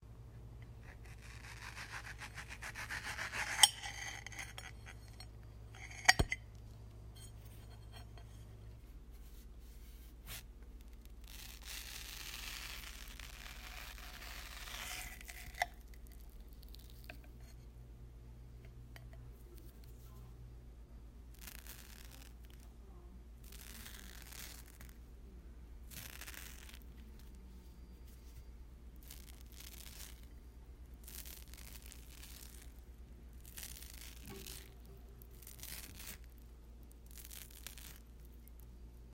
Cutting Mango 1 (plate)
Cutting a mango on a ceramic plate.